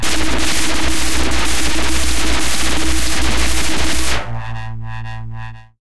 DJB 57 blast
Some Djembe samples distorted
dark, distorted, distortion, drone, experimental, noise, perc, sfx